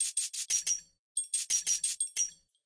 90 Atomik standard percussion 04
standard lofi hiphop percussion
percussion, free